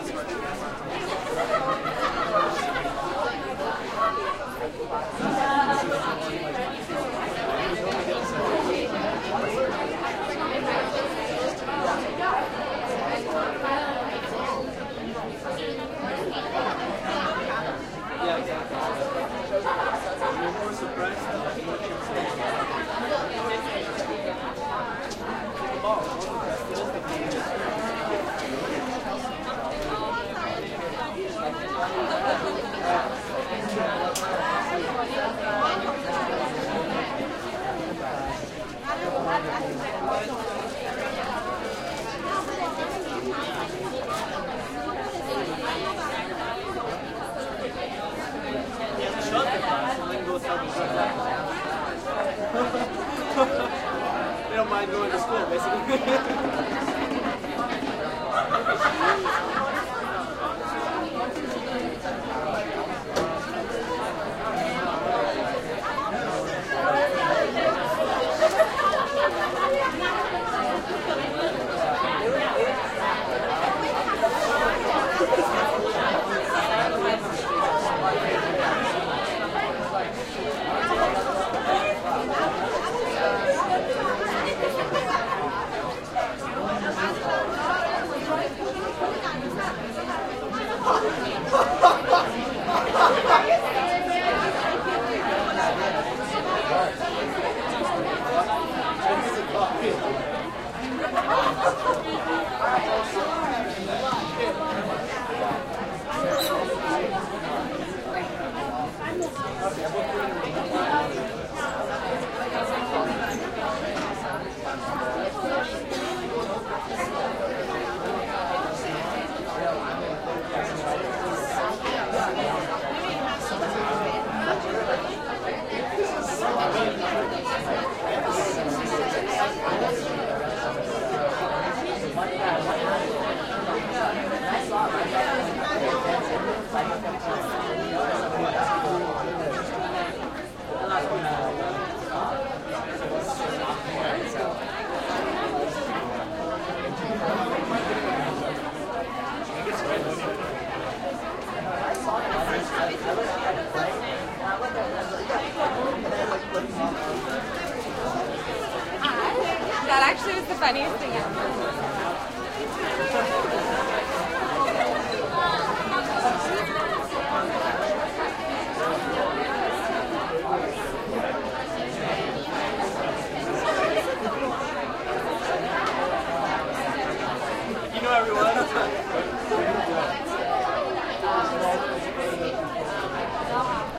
crowd int medium dense active fun McGill students cafeteria eating area Montreal, Canada
active, cafeteria, Canada, crowd, dense, fun, int, McGill, medium, Montreal, students